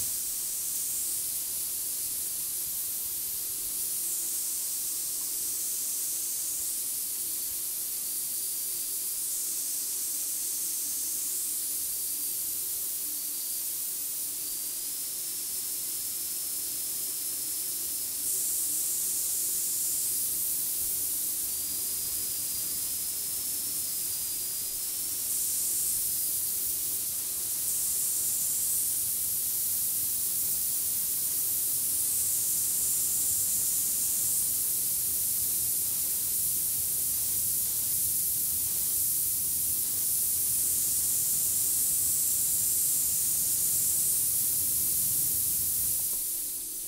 There is a noisy steam pipe valve in my bathroom that makes a nice whistle sound when the heat comes on.Recorded with Rode NTG2 mic into Zoom H4.